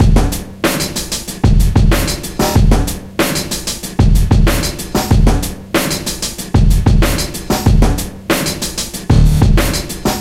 VST slicex combination + dbglitch effect vst
drumloop, breakbeats, loop, loops, drum, quantized, drums, hop, hiphop, hip, drum-loop, drumloops, beats, beat, breakbeat